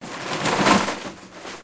Aggressive Clatter 02
Aggressive debris being tossed and clattering (2).
clatter, idiom, impact, rattle, debris, garbage, shuffling, crash, junk